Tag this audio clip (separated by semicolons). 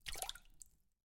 liquid; SFX; water; splash; drop